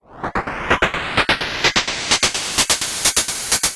Snappy Noise Riser
effect, fx, riser, rising, sound-effect, sweep, sweeper, sweeping